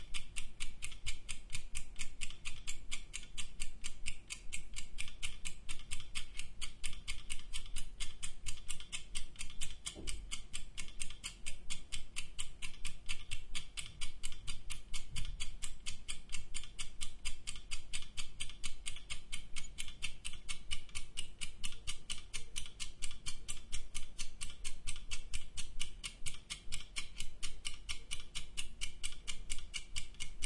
Audio-Technica AT3031 Shure FP24
To test some microphones and preamps I used the following setup: A Sony PCM-D50 recorder and an egg timer. Distance timer to microphones: 30 cm or 1 ft. In the title of the track it says, which microphones and which preamp were used.